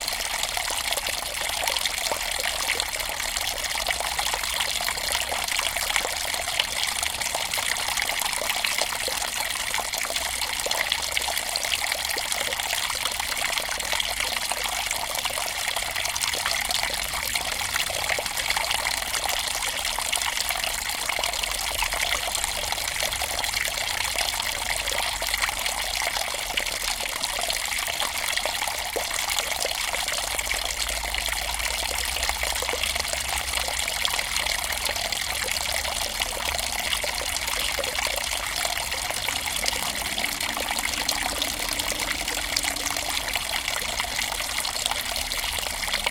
Rain water pours out of pipe.
Recorded: 14-06-2013.
XY-stereo, Tascam DR-40, deadcat